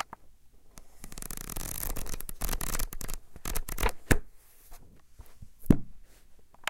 Clicking noise produced by running a metal tool across the ridges of a plastic fastener. Recorded using a Roland Edirol at the studio in CCRMA at Stanford University.